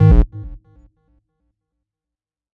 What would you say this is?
Cancellation sound, suitable for a video game. Made with FL Studio.